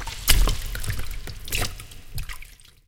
loud splash1
Loud splashing noise, with reverb
splash, water